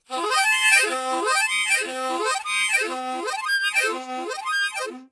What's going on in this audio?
Bb Harmonica-3
Harmonica recorded in mono with my AKG C214 on my stair case for that oakey timbre.
harmonica, bb, key